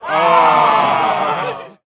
A group of people saying "aww" in disappointment.
aww, people, group, funny, crowd, sad